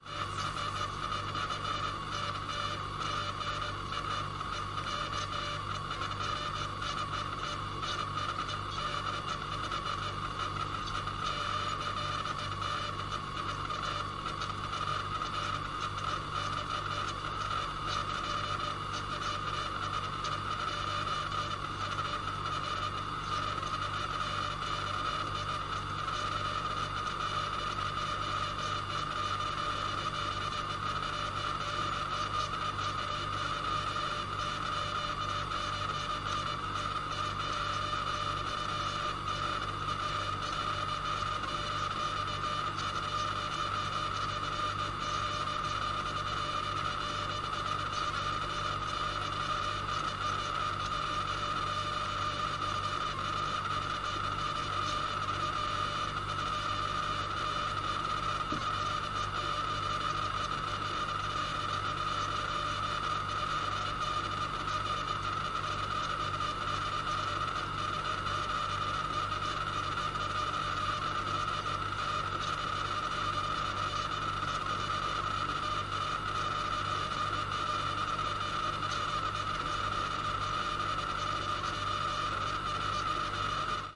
Some weird recording that I found on memory card of my recorder. I don't know what's the source but I like something about it...
Recorded with Tascam DR 100 mk3